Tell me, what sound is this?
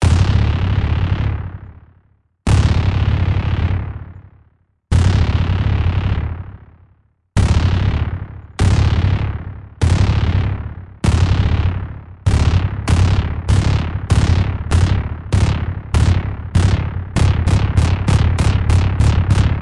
trailer movie
I created sound in Synth1/EQ/REVERB (VSTi). Tell me in comments, where used my sound (Please)
intro, cinema, movie, coming, sound, theatre, soundeffects, trailer